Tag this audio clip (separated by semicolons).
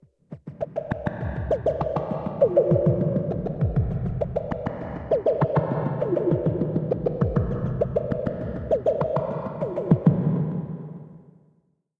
loop; groove; rhytmic; 100bpm; sequence; multisample